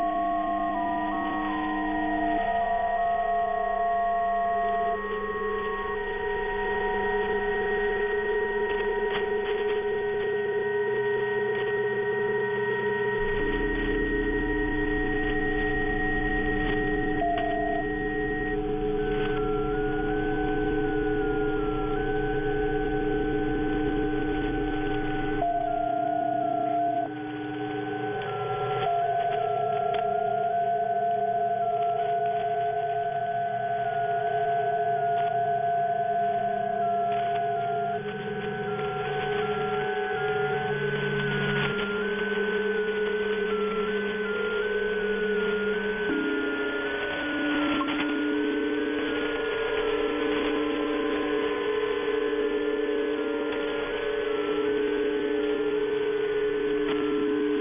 PSK31 etc multiple on 14071.0kHz USB wide
Made using the online remote shortwave receiver of University of twente in Enschede Holland:
Made in the part of the 20-meter ham band where PSK31 is the dominant digital mode, with the receiver deliberately mistuned, in SSB mode USB at it's widest setting to get a mishmash heterodyning sound.
sci-fi, psk31, radio, noise, dare28, heterodyne, single-sideband, mishmash, digital, ham, USB, electronic, ham-radio, shortwave, SSB, digital-modes, drone